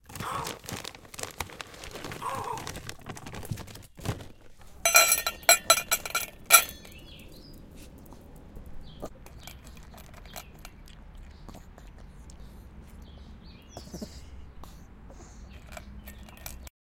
dog food
Giving dog pellets. Dog eats it.